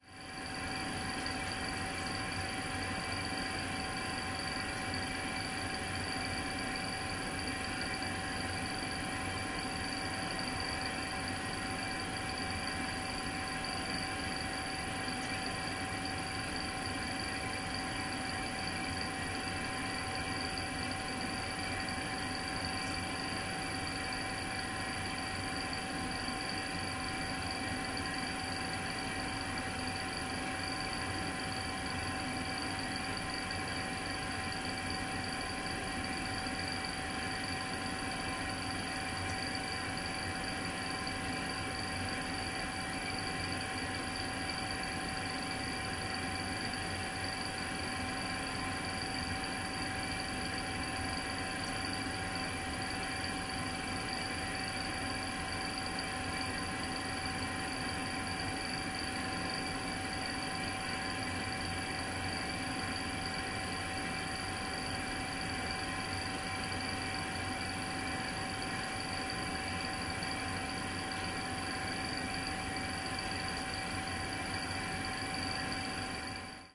Basement Motor/Boiler 2
Recordings from an old swimming pool basement, there're some "motor" noises, the old purifying plant, and a boiler. Recorded with zoom h2
bad, basement, bath, boiler, continuous, earth, echo, fear, lonely, luzern, mechanical, motor, neu, plant, pool, purifying, rolling, under